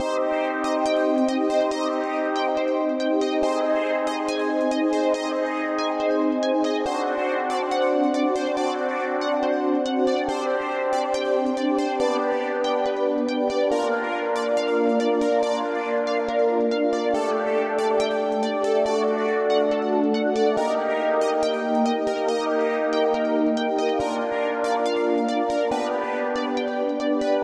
A dark feeling melody with a synth key and delay.